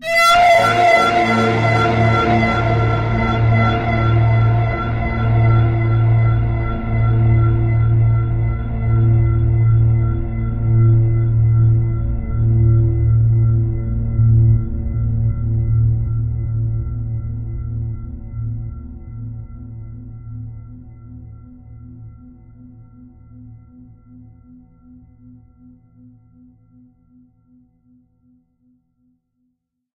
Hi everyone!
SFX for the scream moment in horror game or movies.
Software: Reaktor.
Just download and use. It's absolutely free!
Best Wishes to all independent developers.
creepy, drama, fear, ghost, horror, monster, phantom, scary, scream, sinister, terror